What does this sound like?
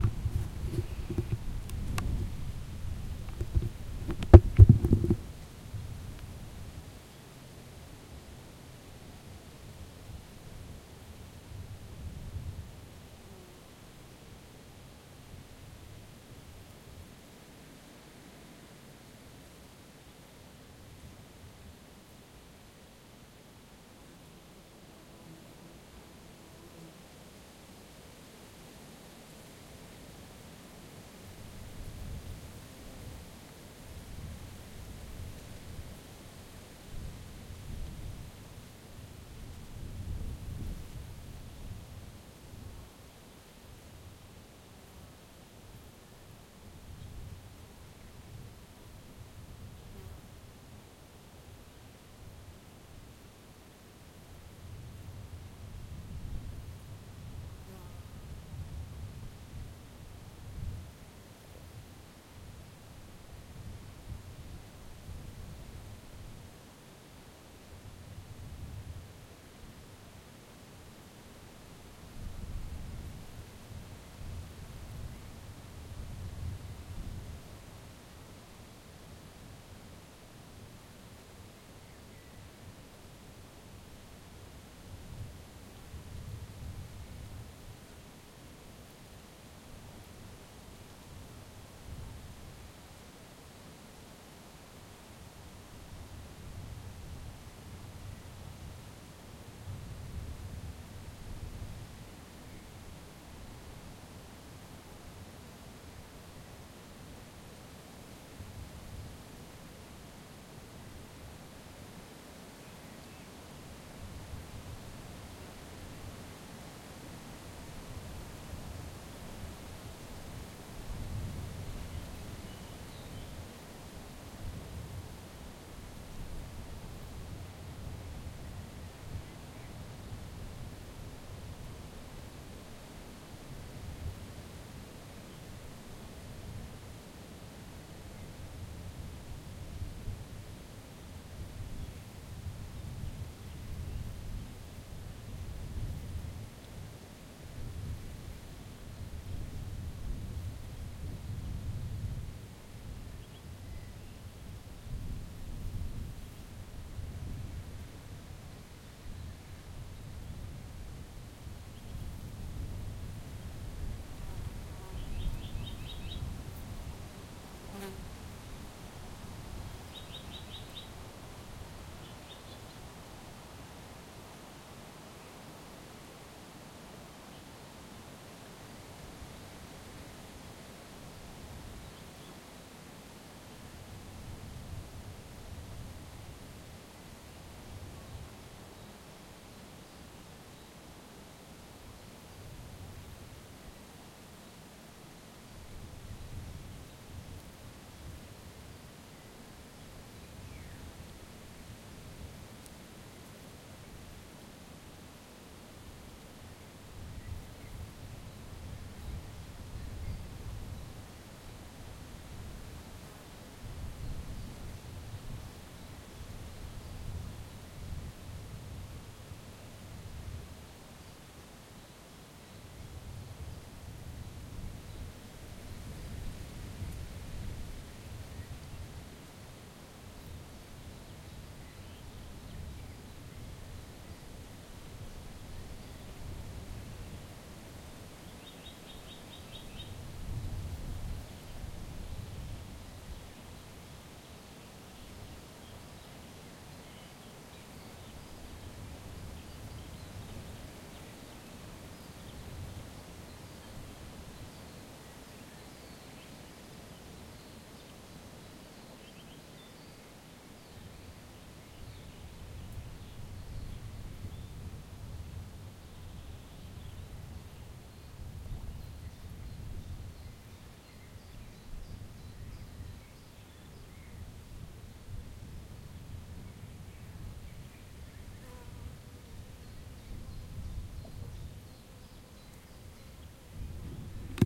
windy spring in the woods - front
windy spring in the woods
background-sound, branches, estate, nature-ambience, nature-sound, naturesound, naturesounds, spring, windy, woods